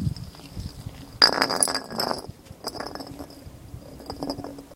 Rolling Bottle 03
Sounds made by rolling a small glass bottle across concrete.
bottle, glass, roll